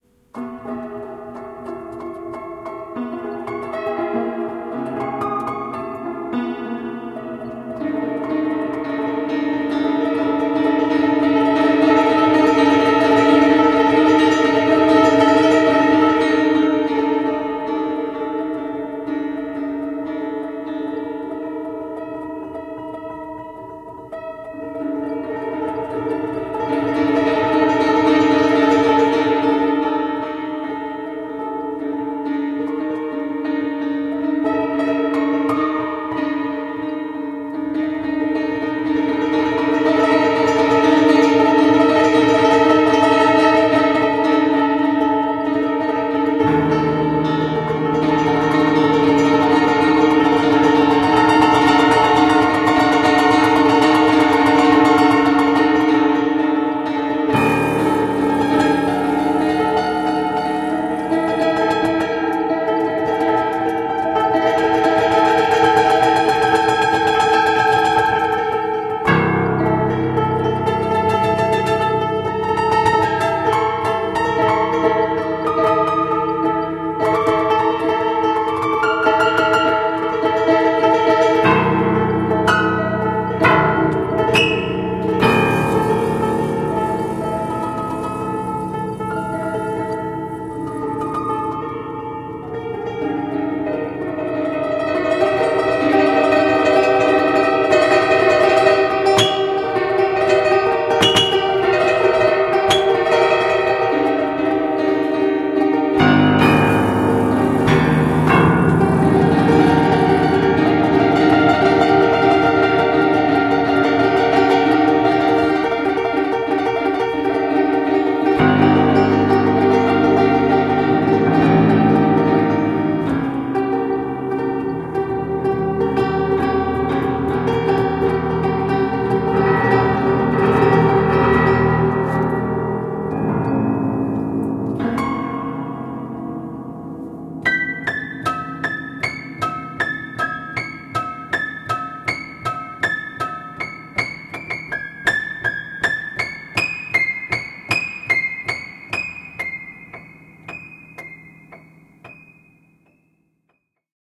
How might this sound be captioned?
series of broken piano recordings made with zoom h4n